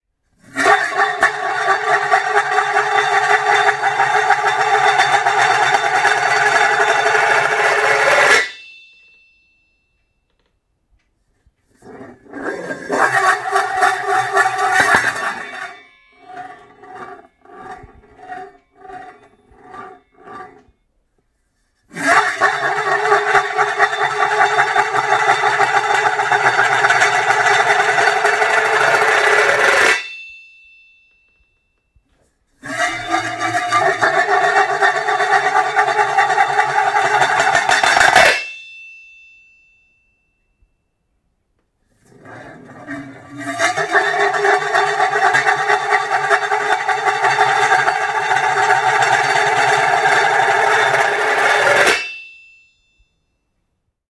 Metallic lid of pan rolling in the kitchen counter. Mic: Neumann KM 185 Supercardioid, Zoom F8 recorder